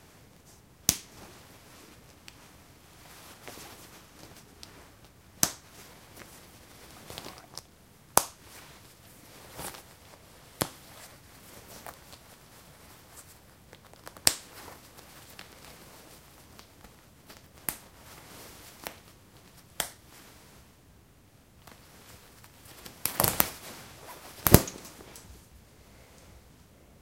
Opening and closing some snap fasteners on my autumn jacket